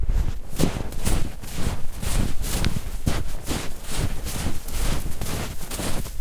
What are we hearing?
småspring i djupsnö 1
Running in deep snow. Recorded with Zoom H4.